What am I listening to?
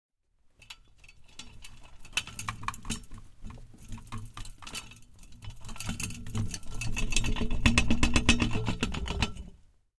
Rattling some metallic objects in a small room. Recorded in stereo with Zoom H4 and Rode NT4.